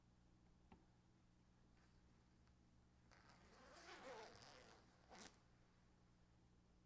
A zipper is closed.